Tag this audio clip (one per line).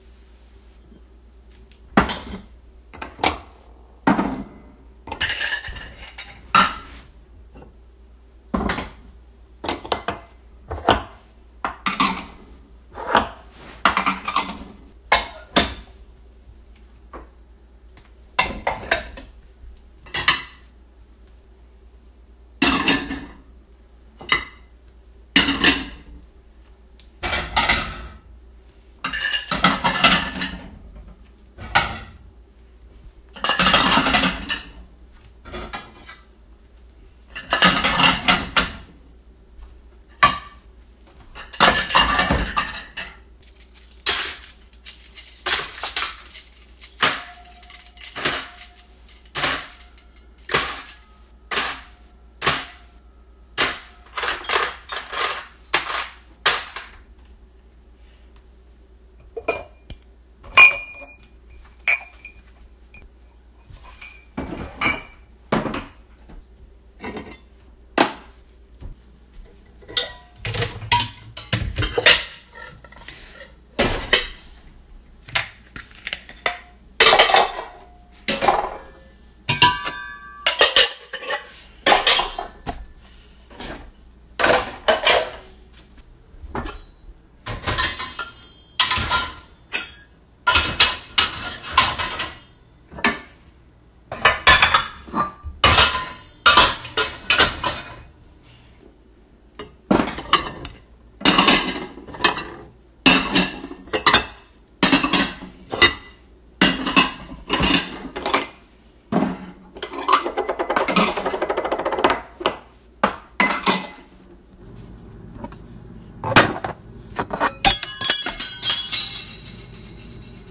cafe
clank
clinking
dining
dishes
restaurant
silverware